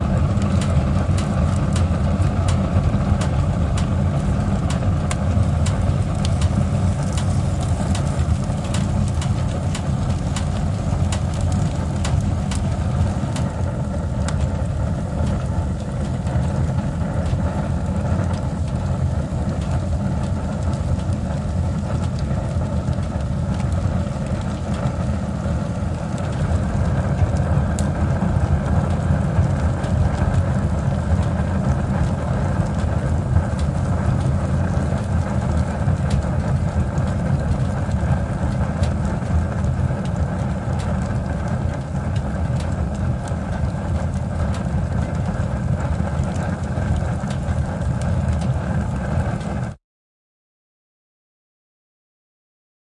Fireplace Flame
I was warming up the fireplace and the flame just really took off. It sounded really epic and sinister so I decided to record it. The menacing vibe was captured fairly well with the recording too I think.
burn, burner, burning, combustion, crackle, fire, fireplace, flame, flames, gas, heat, hiss, stove